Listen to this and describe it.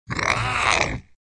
An ogre like voice